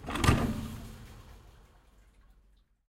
Heavy hit on tube with water, secondarily hitting a fence
Nice hit with several secondarily reactive sound.
drops, fence, heavy, hit, impact, metal, pipe, tube, water, well